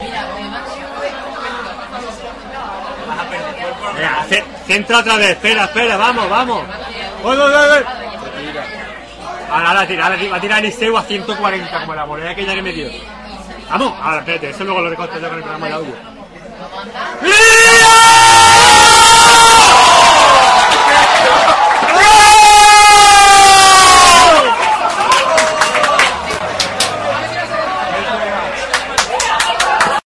málaga scores goal
Malaga´s pub ambient sound in Málaga CF goal (Málaga 3 -Real Madrid 1)
goal, sound